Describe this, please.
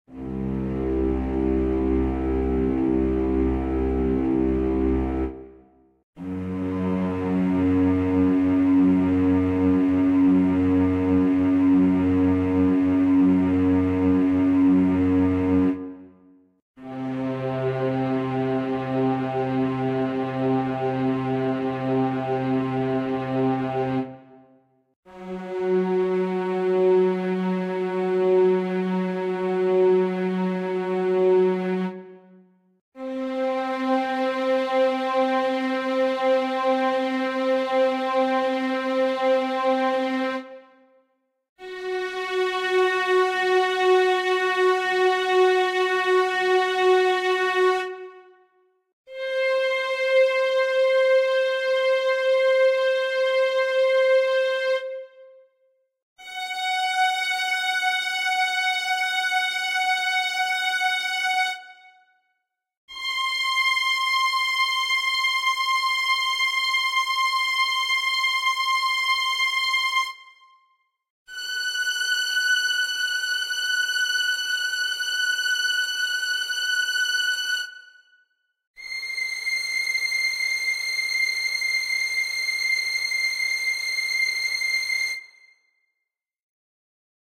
Made in FL Studio 12 |
Extensions Used: Direct Wave |
First Note: C1 |
Second: F1 |
Third: C2 |
4th: F2, and so on |